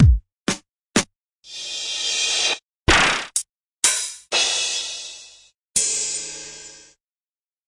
The drumkit for shortcircuit is here, put the sample in the same folder, and you'll get these sound working: